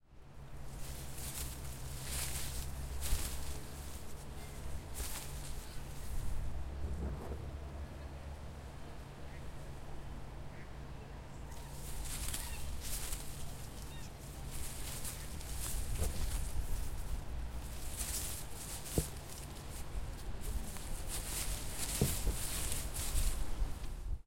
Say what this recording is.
A recording of someone walking slowly through dry leaves, could be used for footsteps or simply rustling of leaves.